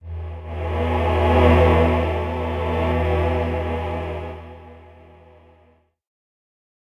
Choir Sting 4
A short choir sting, but with a synthesized chorus.
I'd love to see it!
sting, stinger, choral, religious, synth, music, electronic, vocal, church, melodic, singing, cinematic, choir, voice, processed, musical